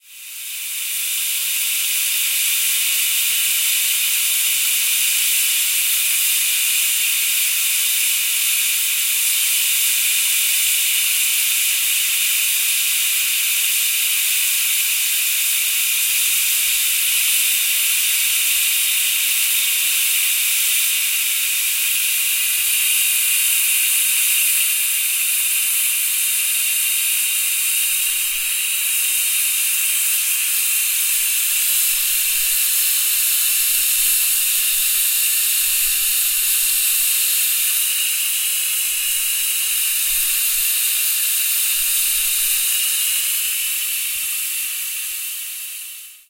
Sound of a pressure cooker. Sound recorded with a ZOOM H4N Pro.
Son d’une cocote-minute. Son enregistré avec un ZOOM H4N Pro.
air, cook, cooker, cooking, drop, hot, kitchen, pressure, pressure-cooker, steam, water